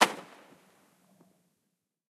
Burning arrow 02
Recording of a fire arrow being shot.
Fire-arrow, archery, burning-arrow, field-recording